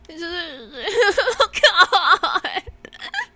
whimpering oh god
whimpering "oh god" in a fearful way
god, oh, cry, whimper